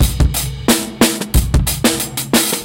A short drum loop with a distinctive "low-fi" and "raw" feel. Could work well in a drum and bass or hip-hop project. Recorded live with a zoom H2N (line input from a soundboard).
beat, breakbeat, drum-and-bass, drum-loop, drums, groove, hip-hop, loop, percussion-loop, samples